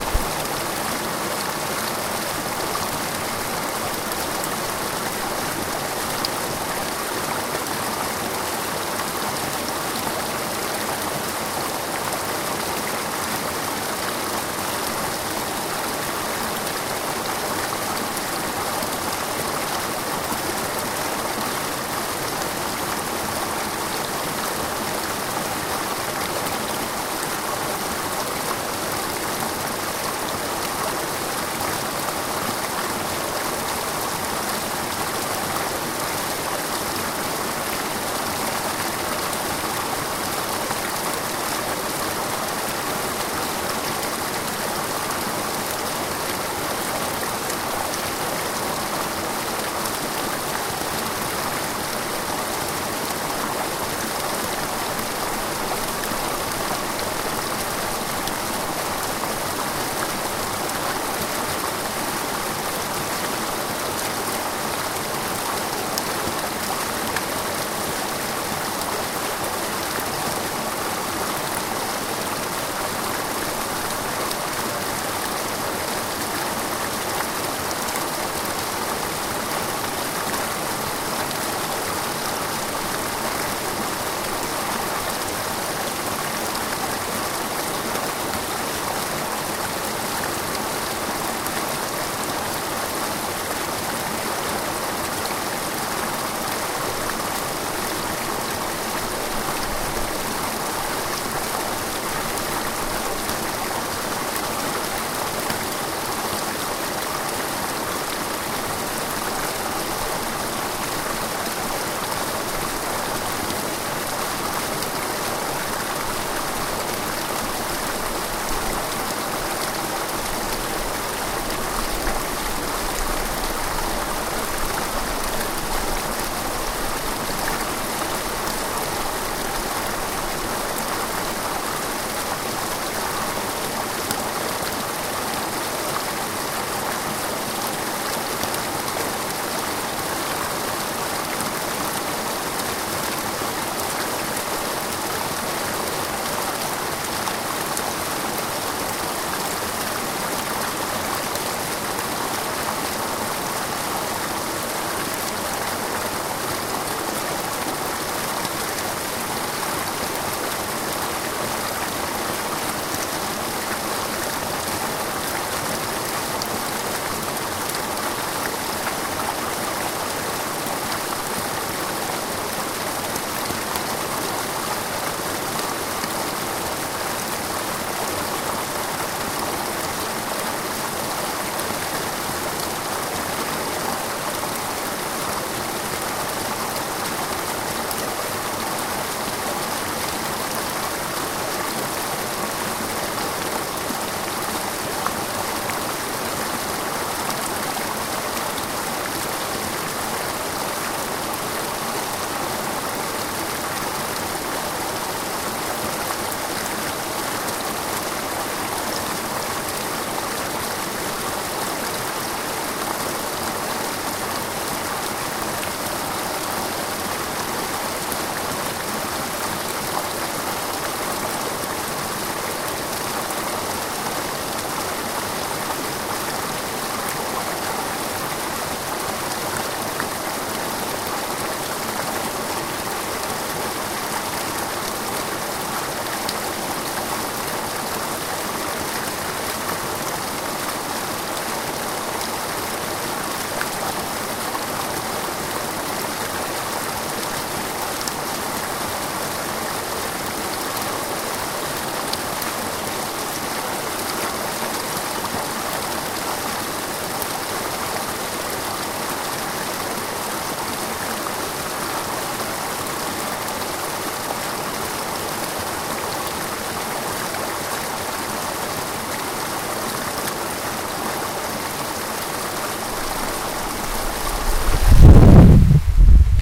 Field-recording of a stream near Rimrock WA.
field-recording, water, Stream